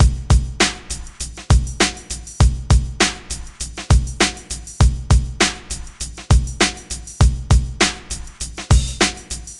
downtempo Drum loop created by me, Number at end indicates tempo
beat, drum, loop, downtempo, drumloop